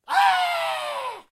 Ahh!!! - Scared Male Scream
My buddy says "Ahhh!" in a scared screaming manner. In fact, he just saw Margaret Thatcher naked on a cold day! What horror!
man, vocal, voice, speech, Ahh, scared, Surprised, Ahhh, male, spoken, talk, Ah